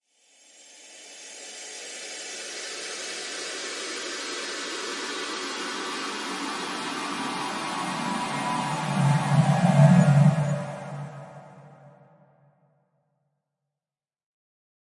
star carcass
soundeffects, soundeffect, star, sfx